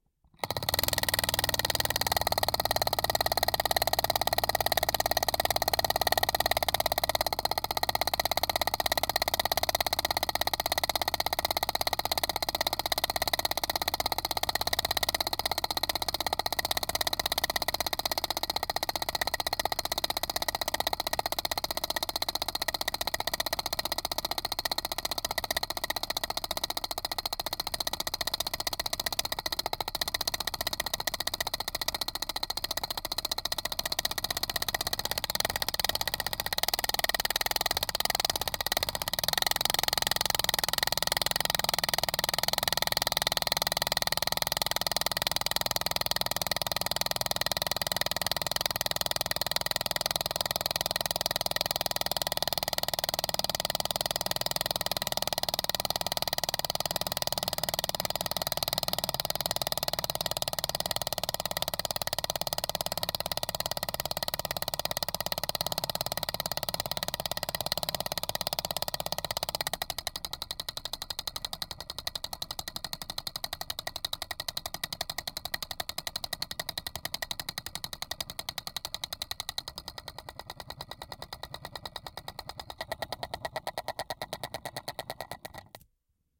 Drilling Fast
Over 1 minute of a fast drilling sound. Made from some sort of clockwork touching the mic and running really fast. Can also sound a bit like a machine gun or another weapon :)
weapon, sci-fi, mechanical, gun, Drilling, tick, mechanism, continuous, machine, pendulum, machine-gun, time, ticking, clockwork, fast, clock, long